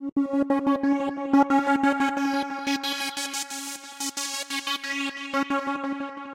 6 ca dnb layers
These are 175 bpm synth layers background music could be brought forward in your mix and used as a synth lead could be used with drum and bass.
techno, electronic, dance, drum, trance, fx, atmosphere, loop, music, layers, electro, rave, sound, house, club, beat, synth, bass, effect